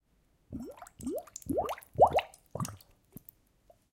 water bubbles 07
Water bubbles created with a glass.
water, bubble, bubbling